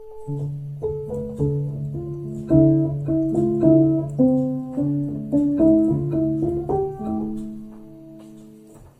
An upright piano phrase, and a ticking wall clock.
Recorded by Sony Xperia C5305.